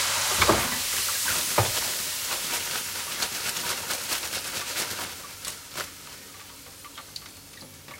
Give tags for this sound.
cooking french-fries fried kitchen oil potatoes

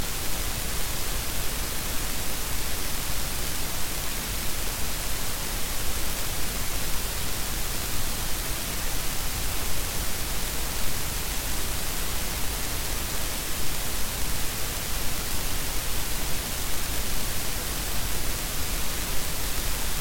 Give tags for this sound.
novation noise nova

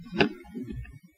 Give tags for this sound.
clack impact thud thump

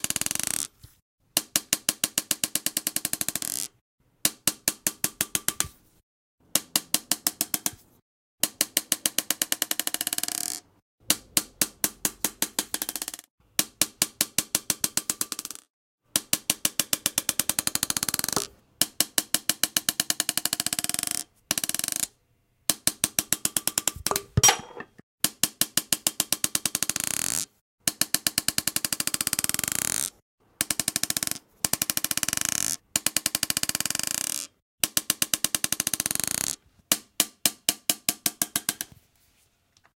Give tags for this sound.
bouncing marbles glassmarbles

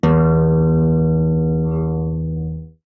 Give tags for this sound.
acoustic-guitar guitar single-note yamaha

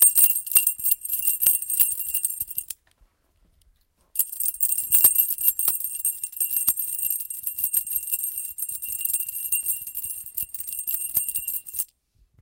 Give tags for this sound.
Mysounds LaPoterie Rennes France Keys

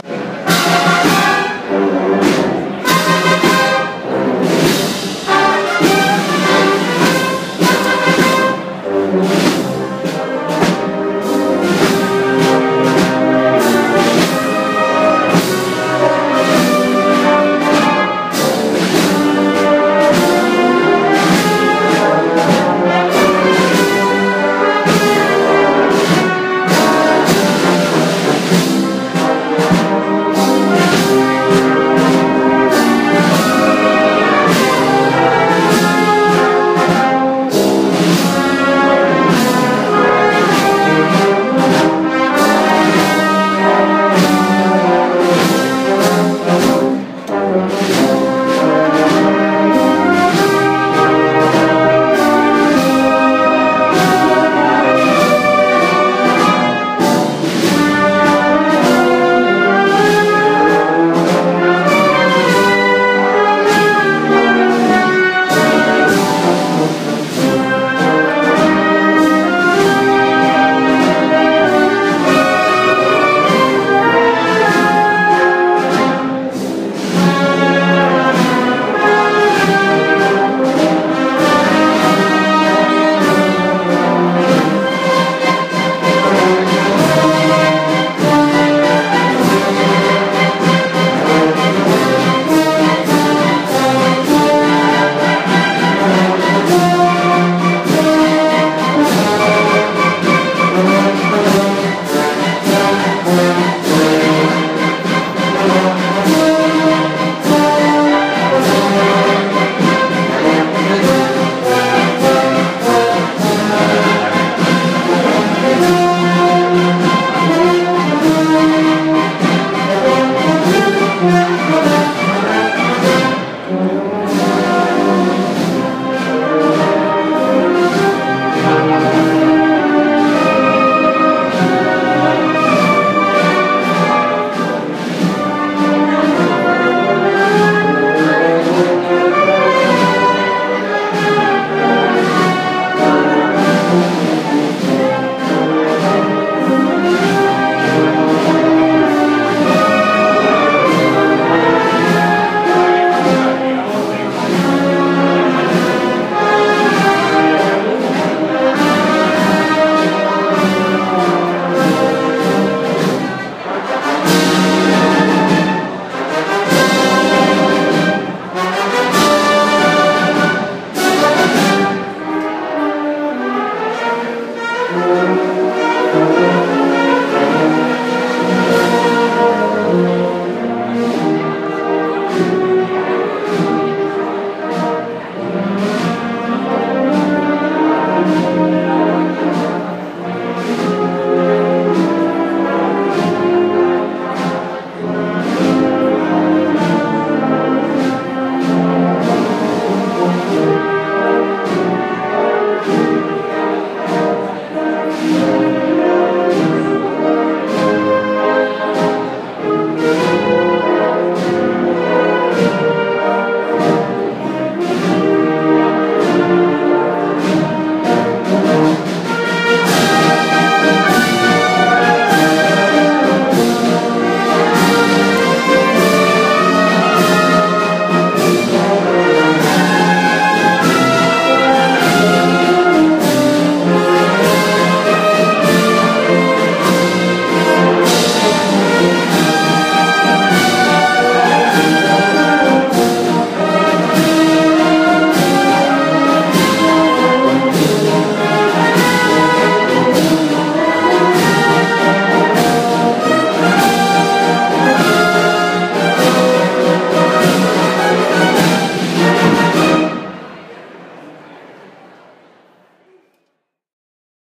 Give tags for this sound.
Brass City Religion Environment Sevilla Street Seville Marching-Band Procession Music

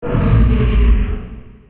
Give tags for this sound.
evil monster aou horror roar

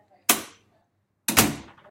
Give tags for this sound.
cerrar puerta close open abrir door

